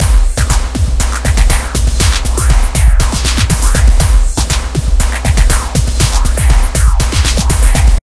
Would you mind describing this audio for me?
Made with Caustic OSX. Just a random drum loop. Can be used for dubstep.